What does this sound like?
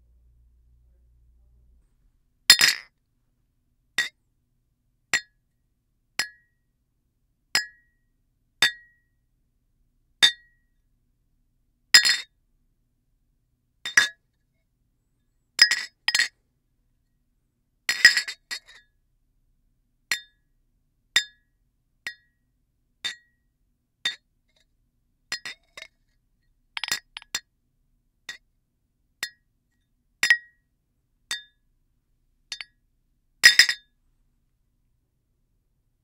Drinking Glasses contact (Clink)
Short drinking glasses, assorted contact.